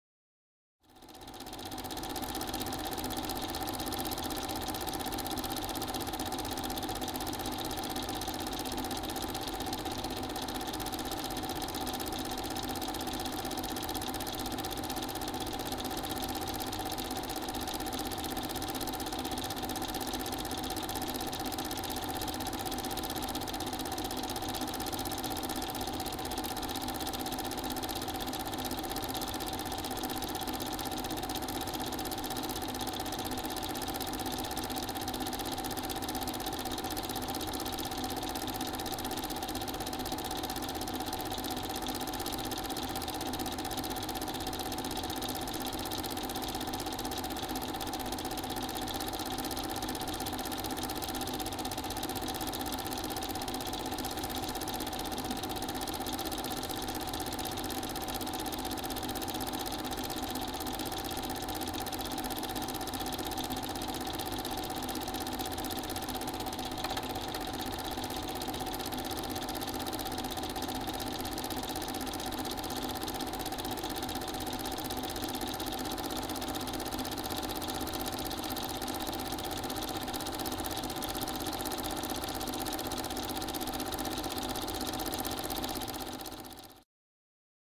movie projector-02
The sound from our 8mm movie projector, Eumig M, was recorded while showing a film. The sound was recorded rather close (½ m) to the mid of the projector in a well-damped room with a Zoom H4 stereo recorder, mounted on a three-pod.
8mm, film, hobby, movie, projector